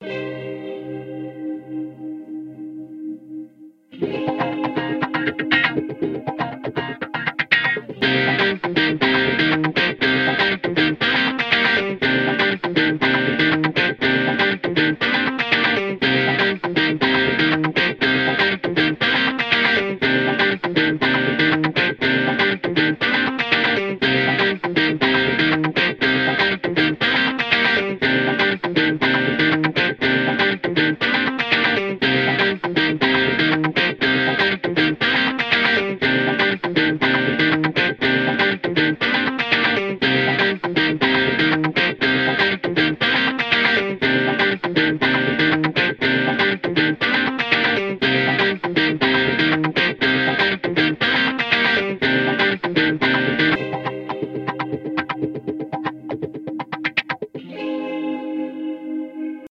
The chase - 27:12:2020, 19
chase, dance, electro, electronic, house, loop, music, synth, techno